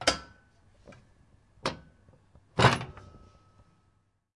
Tin box
Opening one of those small cases you hang on the wall to keep the keys in. (Recorder: Zoom H2.)
object, box, recording, unprocessed